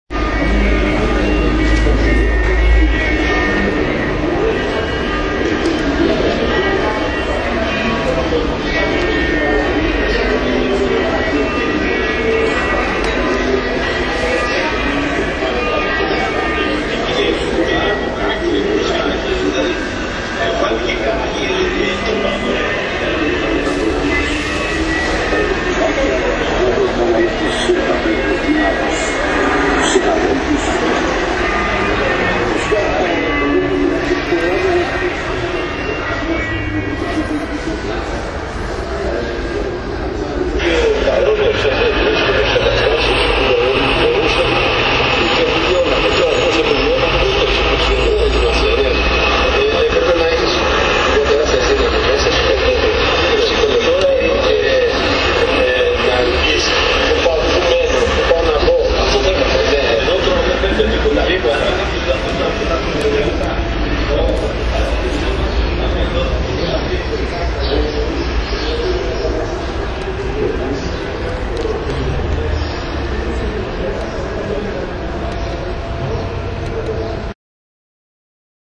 athens art installation
Athens, visual art installation